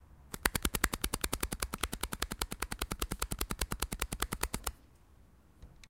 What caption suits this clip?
Sounds from objects that are beloved to the participant pupils at the Doctor Puigvert school, in Barcelona. The source of the sounds has to be guessed.
doctor-puigvert, february, 2014, mysounds, sonsdebarcelona